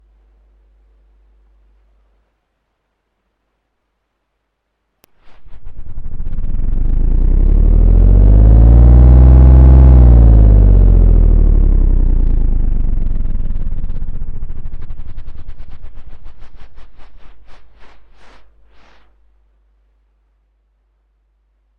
DIY microphone experiment. Sound source is propeller of a household fan.